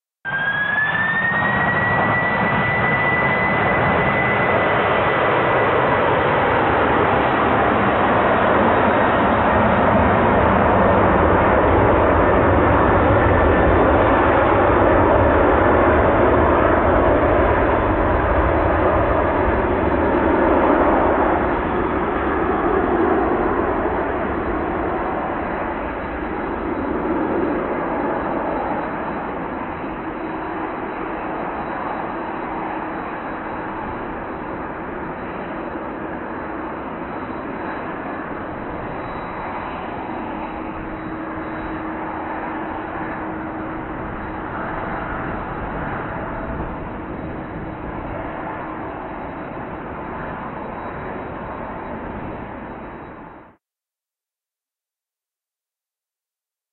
15-10 Take-off
This is covers the very end of scene 3 (the take-off scene from Manchester) of Ladies Down Under by Amanda Whittington. These are best used with one of the professional cueing systems.
Passenger-aircraft-engine-take-off, aircraft-taking-off